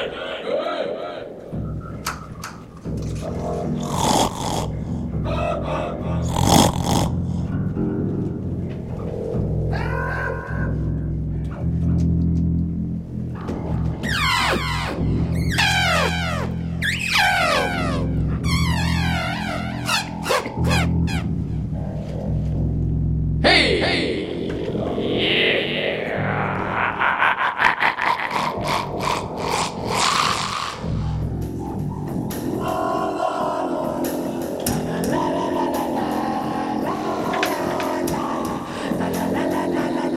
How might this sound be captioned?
Weird Session Track1
This is how a band rehearsal sounds through a ring modulator....
crazy, fx, modulator, people, ring, weird